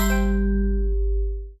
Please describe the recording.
Correct Bell
Digital bell sound, can represent a correct answer, or anything that was done well, or just an actual bell being played.
bell, chime, complete, correct, ding, game, right, win